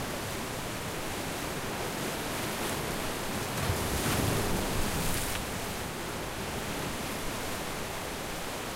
Wave Mallorca 6 IBSP2
16 selections from field recordings of waves captured on Mallorca March 2013.
Recorded with the built-in mics on a zoom h4n.
post processed for ideal results.
athmosphere, field, field-recording, mallorca, mediterranean, nature, recording, water, waves